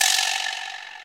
Qjada sound similar to TR-727